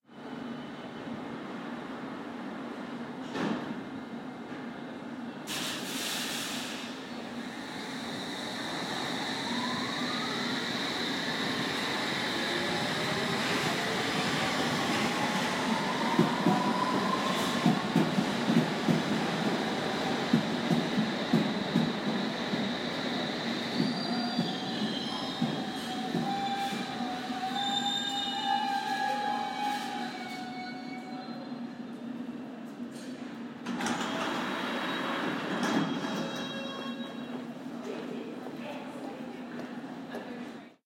Tube Bank station 140918
Trains entering and leaving Bank Tube station, London. 14th September 2018, 9.30 am. recorded on iPhone 5.
underground, tube, London, train